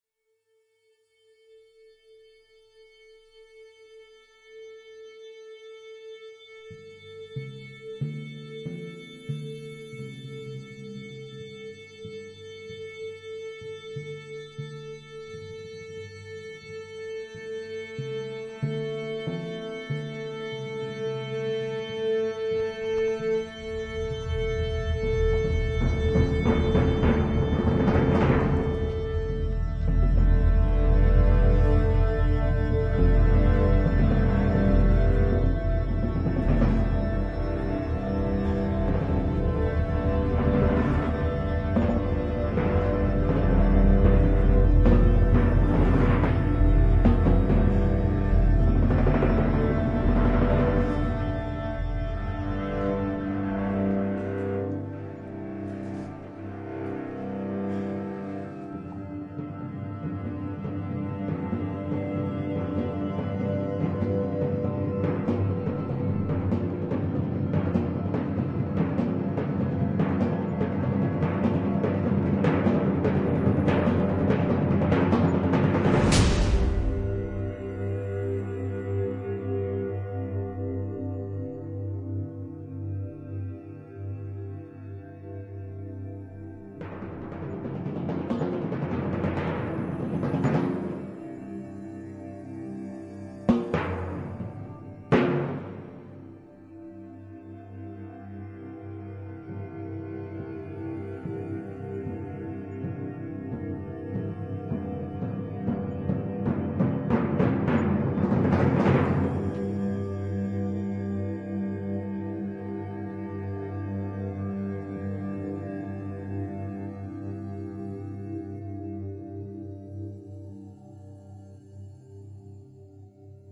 Orquestra sounds with cinematic elements,audio manipulation to create ambience using Paulstrech,compression,EQ,Delay,reverb,midi,samples of percussion.
piano, string, osc